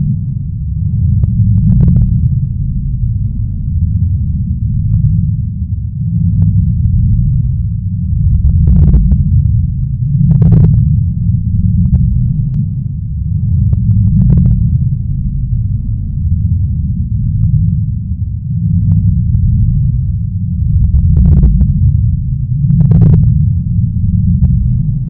Rocket Roar (looping)
Loopable rocket roar type sound
generated, loop, looping, roar, rocket, space-shuttle